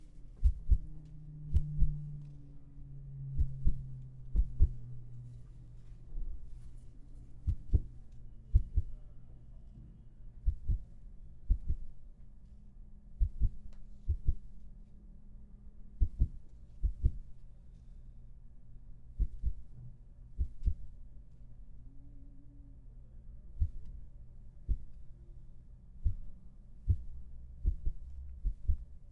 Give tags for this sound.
Beat
Heart
Suspense

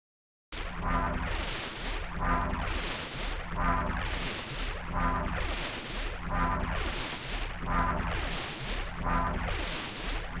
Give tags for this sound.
Futuristic
Noise
Landing
UFO
Futuristic-Machines
Electronic
Spacecraft
Sci-fi
Space
Alien
Mechanical
Take-off

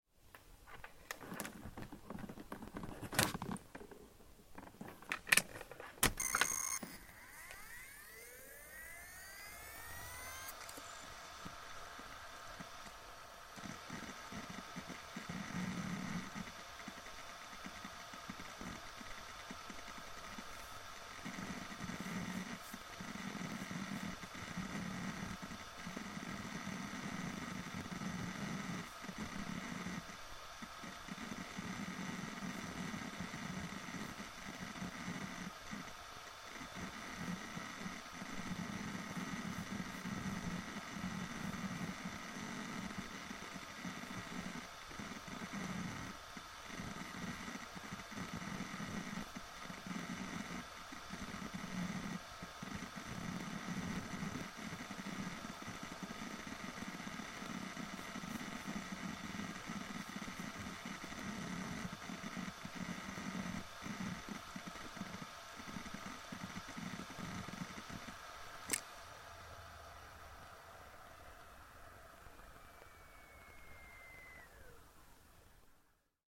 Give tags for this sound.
electromechanics
electronics
harddisk
machine
mechanical
motor
robot
robotics

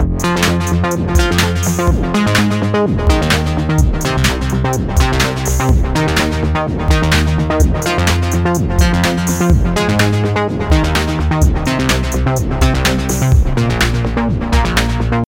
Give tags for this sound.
loop
electro
synth

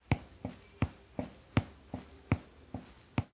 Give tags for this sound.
wooden-floor
Walk
Steps

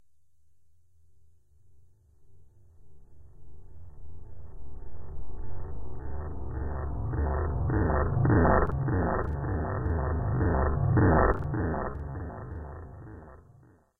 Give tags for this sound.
space-ship
ovni
spatial
paranormal

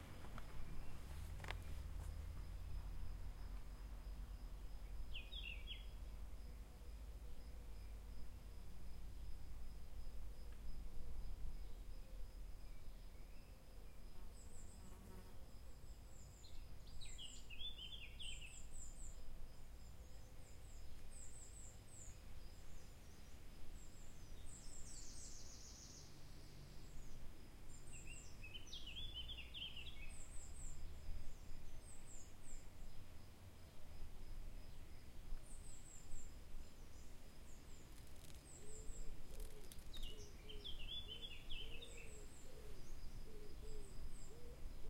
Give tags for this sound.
forest
birds
ambience
trees
nature
field-recording

